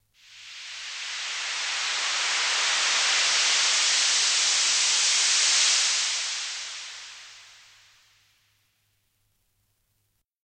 bending a rain stick over